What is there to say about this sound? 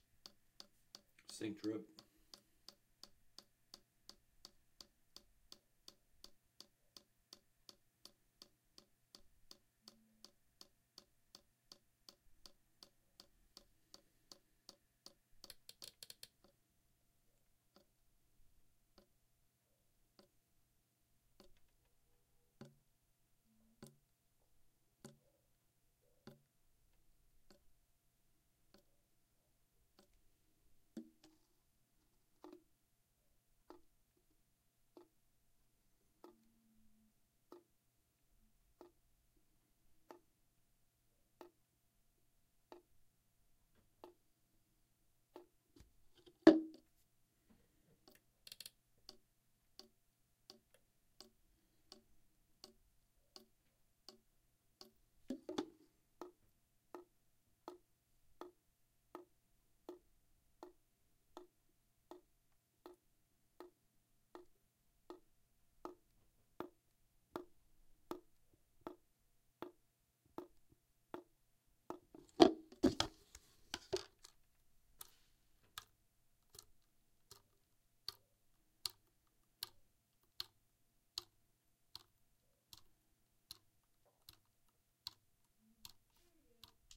sink drip h4n& rode mic
bathtub, bath, shower, running, sink, faucet